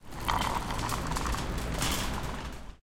Sound of a shopping cart moving in big car park (noisy and reverberant ambience).
campus-upf, cart, centre, comercial, glories, mall, moving, park, parking, shopping, UPF-CS13